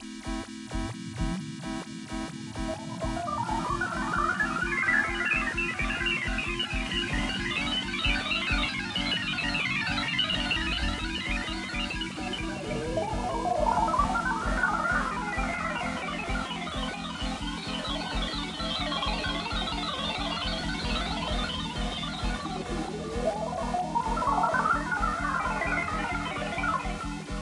Sad Robot
computer sequence and low quality pad.